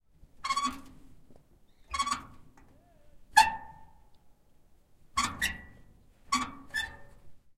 Old Truck Metal Squeaks-Creaks-Rattle-Impact Small Squeaks Tension Press Release
Found an old abandoned truck on a hike - recorded the squeaking and creaking of the doors opening and closing and stressing different parts of the metal. (It was done outdoors, so there may be some birds)
Metal, Squeak, Tension